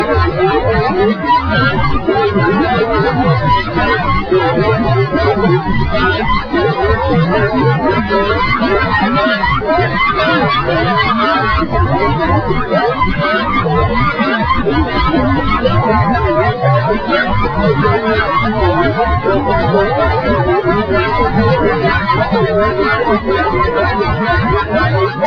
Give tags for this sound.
melody
small
Music
composing

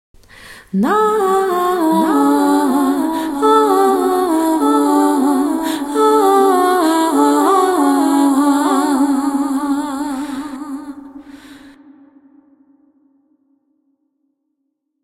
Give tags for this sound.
female-vocal
singing

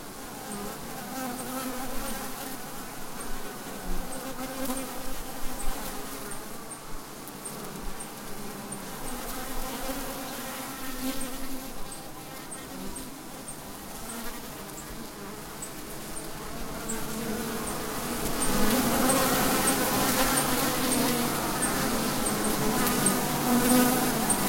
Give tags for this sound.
bees
hive
tree
wind

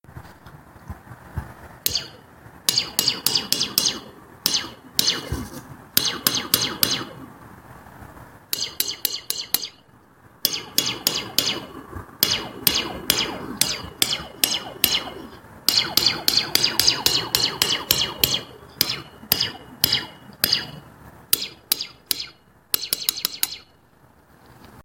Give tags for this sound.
weapon
gun
alien
Sci-fi
weaponry
quark
laser

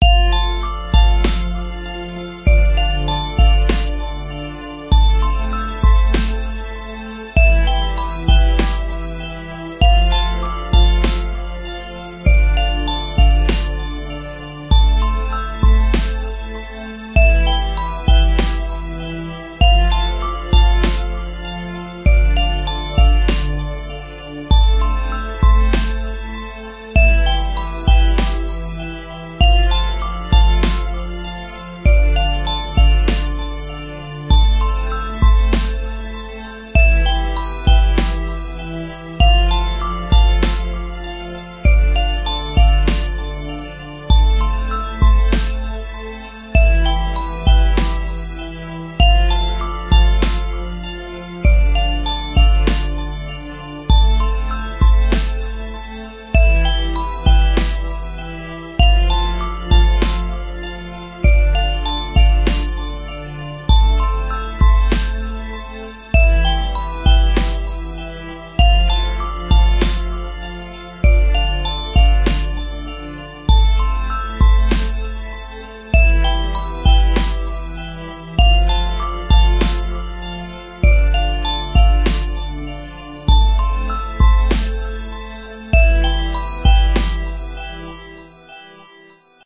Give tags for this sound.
beats
drum-loop
drums
hiphop

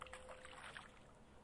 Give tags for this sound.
nature
natural

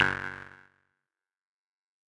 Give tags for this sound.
percussion
percussive-hit

